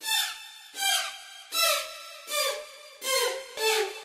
DISCO STRINGS
Some disco hit strings in 6 different keys